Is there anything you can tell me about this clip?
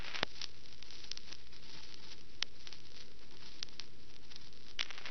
Vinyl Record Crackle Loop
The telltale crackle of a record player, loopable for your convenience
distortion, turntable, crackle, noise, lp, vinyl, record